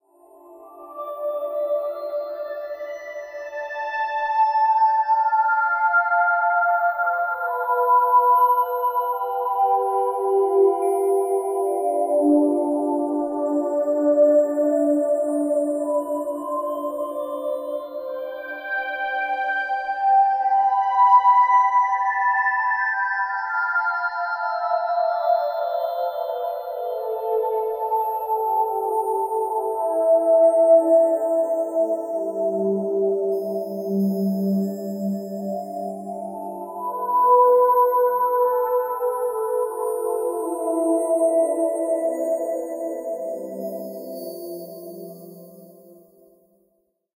mystery riddle sacrament
mystery, riddle, sacrament